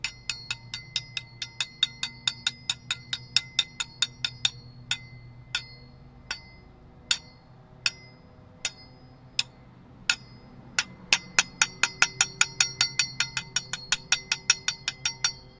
tin-can
The sound was created by smacking a metal fork against a sidewalk.
metal, tapping, tin, tin-can